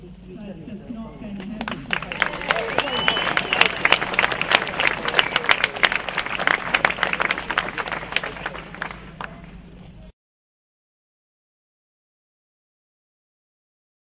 Recorded onto a minidisc at a protest to save Anvil hill in Australia from more mining endevours. The sound is of people clapping in response to a hippie making a speech.
appluading, clap, english, field, group, rally, raw